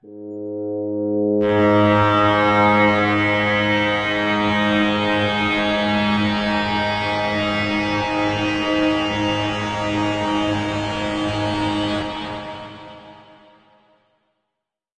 THE REAL VIRUS 07 - GIGANTIC - G#3
Big full pad sound. Nice evolution within the sound. All done on my Virus TI. Sequencing done within Cubase 5, audio editing within Wavelab 6.
pad, multisample